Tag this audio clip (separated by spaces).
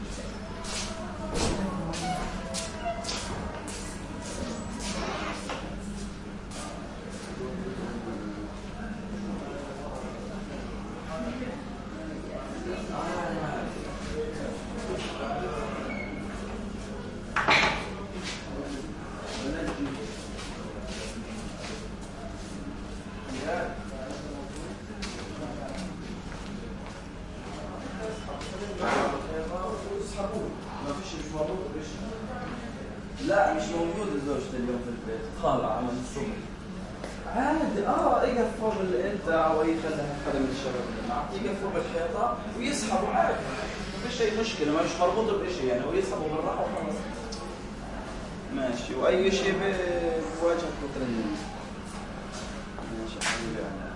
burn ward arabic hospital